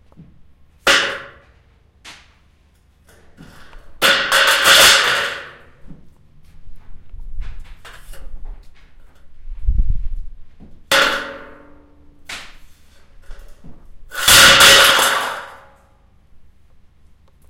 Industrial Metal Runner Drop
Stereo
I captured it during my time at a lumber yard.
Zoom H4N built in microphone.
engine, machine, metalic, factory, operation, mechanical, start, industrial, Power, machinery, sounds, workshop